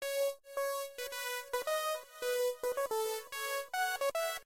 melody created from fl
beat, fl, love, melody, piano, progression, techno, trance